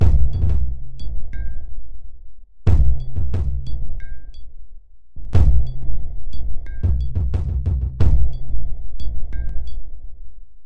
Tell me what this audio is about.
Produced for ambient music and world beats. Perfect for a foundation beat.